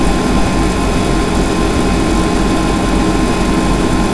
computer noise
background, computer, noise, soundscape